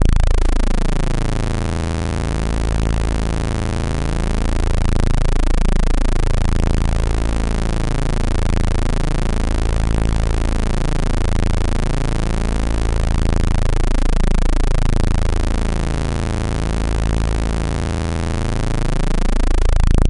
A Deep Sweeping Saw Pulse Effect.